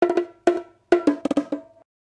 JV bongo loops for ya 2!
Some natural room ambiance miking, some Lo-fi bongos, dynamic or condenser mics, all for your enjoyment and working pleasure.
bongo, congatronics, loops, samples, tribal, Unorthodox